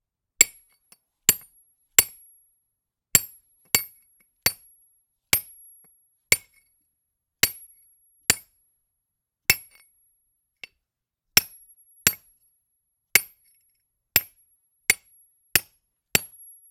Rock Hammer Chisel 01
Hammering a chisel into hard rock.
Rode M3 > Marantz PMD661.
breaking-rock, chisel, rock-break, rock-breaking, rock-chisel, rock-hammer